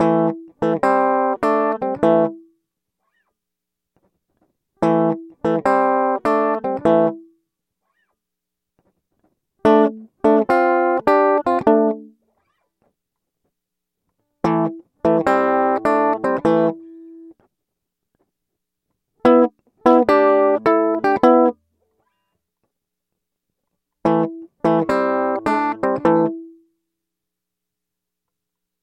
slightly distorted blues guitar 12 bar